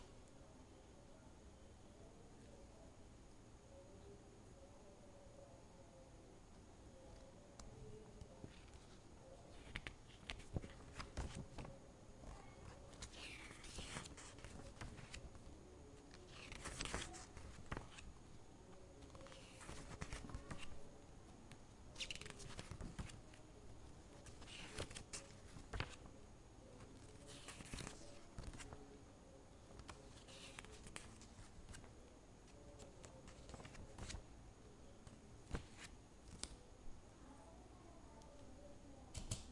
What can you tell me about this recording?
Page turning 2
Turning pages of a book. Recorded in house ambience using Samson Go Mic. Distant chatter can be heard. Need to be cleaned.
Recorded by Joseph
page-flip, book, paper-flip, flip, room, page, book-flip, turning, page-turning, paper